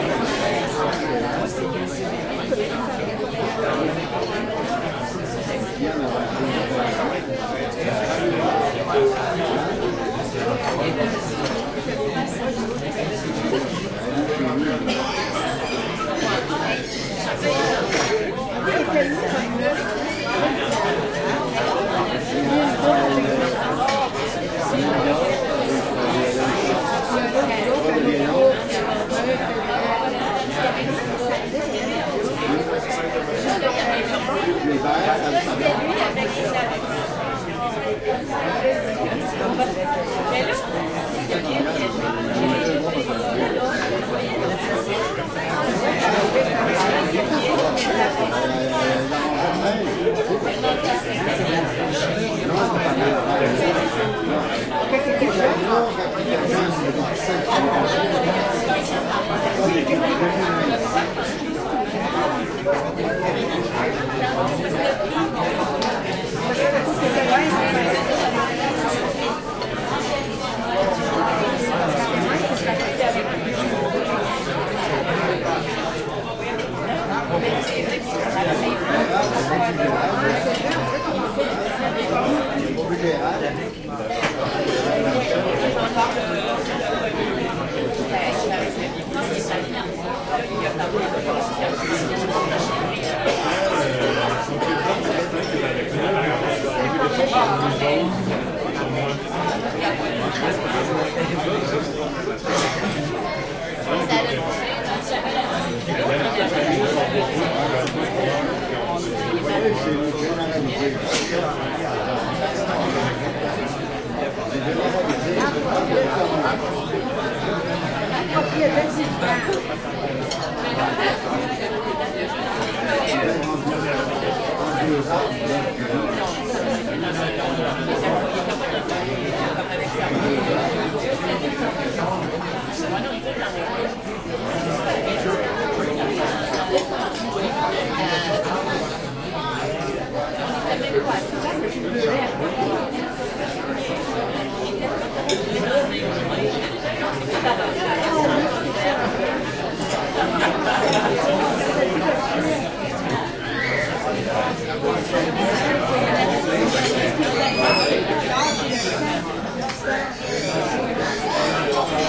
crowd int medium busy restaurant Montreal, Canada
busy, Canada, crowd, int, medium, Montreal, restaurant